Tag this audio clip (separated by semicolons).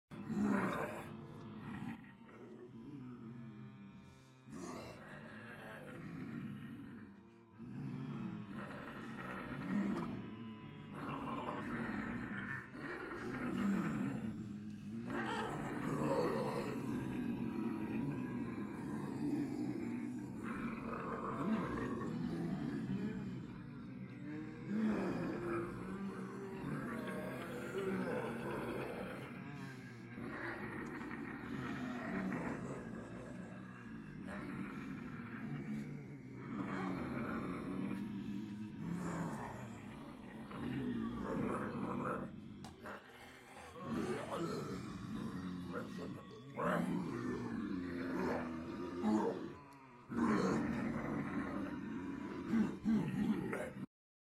roar; voice; solo; snarl; group; ensemble; zombie; undead; dead-season; monster; horror